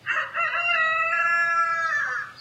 Cock-a-doodle-doo - USA, New Mexico
Sound Engineer: Sclolex
Recorded with a Mid Side LA-OMSC2 microphone and a Tascam DR-60D
blume, cock-a-doodle-doo, crowing, map, mexico, new, new-mexico, project, rooster, Sclolex, usa